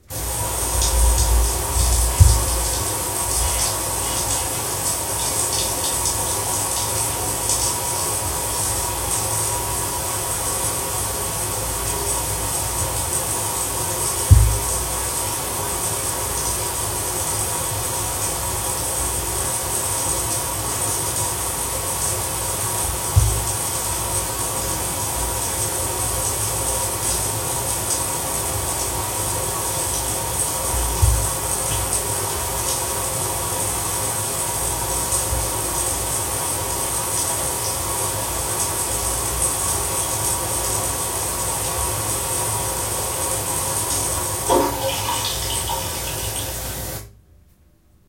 Second recording of the shower sound. This time played and recorded in an old stone house's bathroom in Girona, Spain. Played through a couple of studio monitors and recorded with a minidisc and a stereo microphone on october 16th 2006.
shower, experiment, shower-in-the-shower, bathroom, flickr